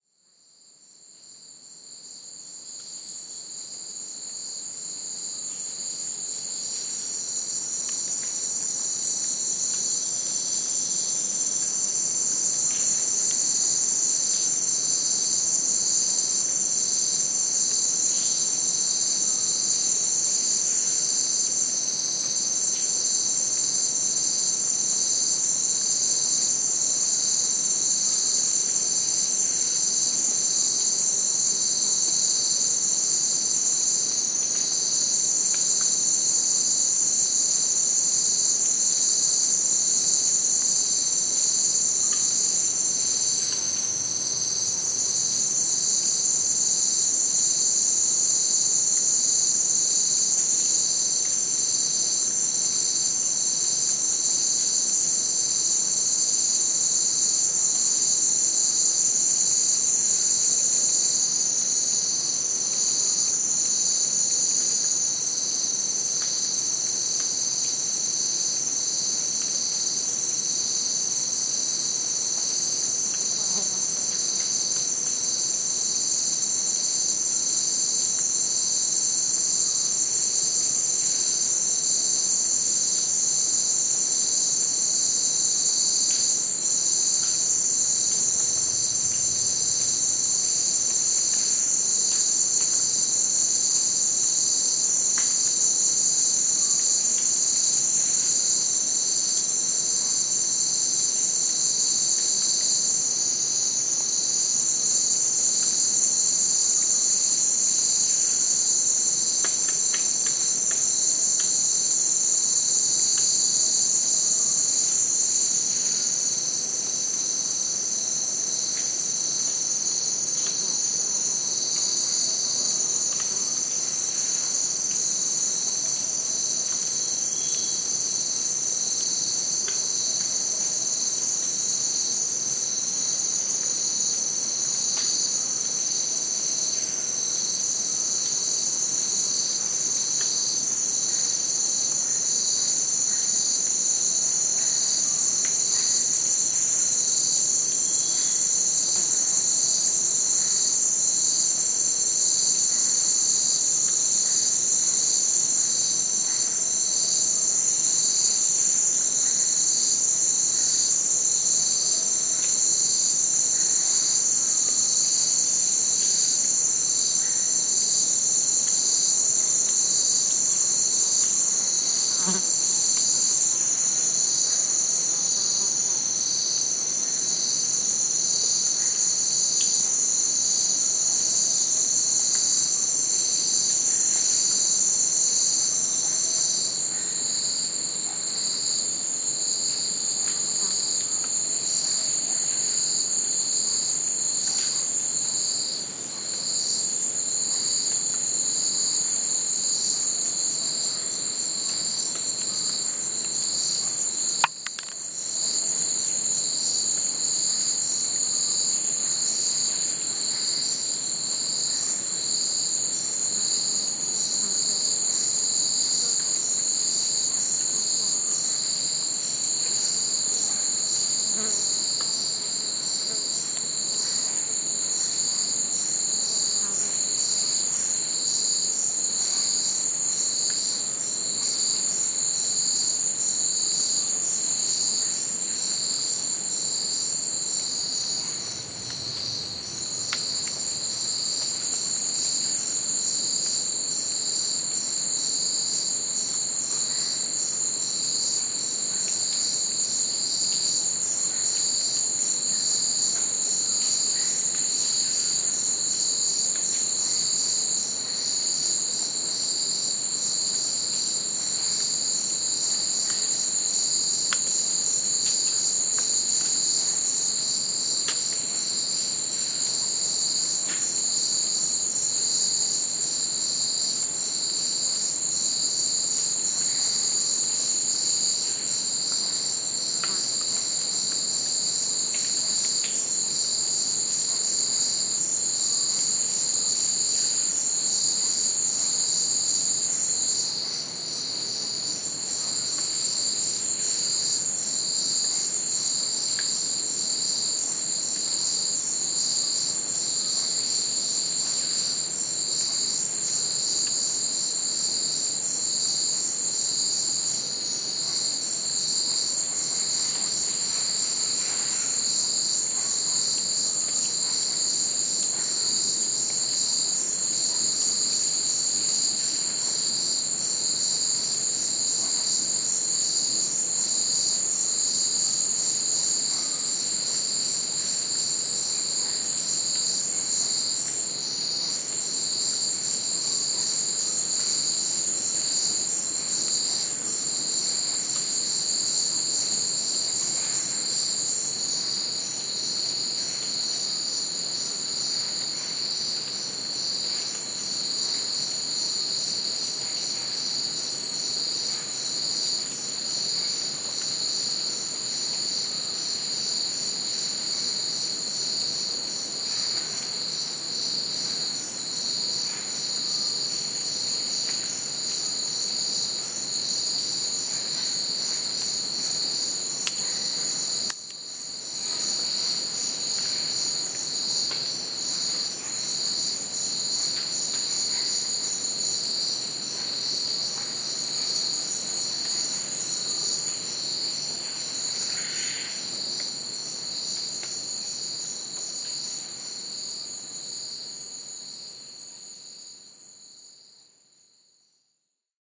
Amazon Jungle - Day
Daytime ambient rainforest recording, Reserva Nacional Tambopata, Amazon Basin, Peru
Tambopata, cicada, national-park, Reserva, River, insect, Posada-Amazonas, field-recording, Nacional, Amazon, nature-sounds, South-America, birds, tropical, bugs, forest, trees, rainforest, Andes, nature, Peru, crickets, bird-call, jungle, bird, ambient, day, insects